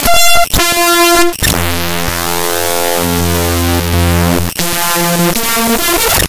some nice sounds created with raw data importing in audacity